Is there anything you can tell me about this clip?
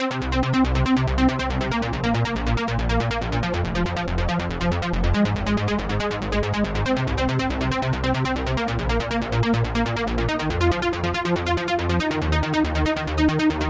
Nephlim bass 2
Longer version of nephlim bass.